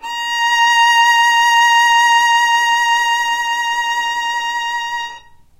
violin arco vibrato